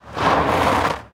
auto car or van stop brake skid gravel short
recorded with Sony PCM-D50, Tascam DAP1 DAT with AT835 stereo mic, or Zoom H2
auto, brake, car, gravel, or, short, skid, stop, van